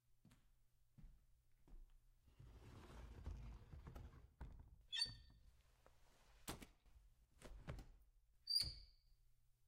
Classroom Deskchair Walk up Slide and Sit
Walking up to a classroom deskchair, lifting the writing surface, dropping a bag, sitting down, and lowering the writing surface
bag-drop, classroom, student-sit, Desk-sit